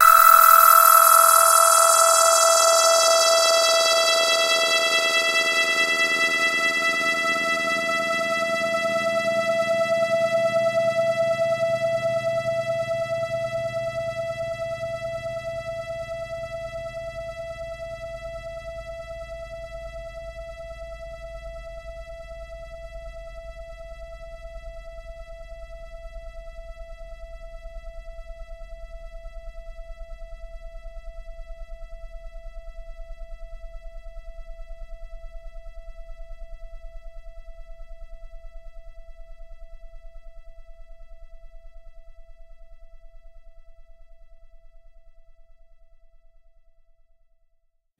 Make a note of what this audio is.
Q Saw filter sweep - E4
This is a saw wave sound from my Q Rack hardware synth with a long filter sweep imposed on it. The sound is on the key in the name of the file. It is part of the "Q multi 003: saw filter sweep" sample pack.
multi-sample, saw, synth, waldorf, sweep, electronic